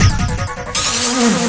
special fx audio